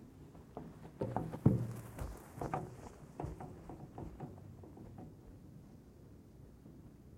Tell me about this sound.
moving a wheelbarrow across a yard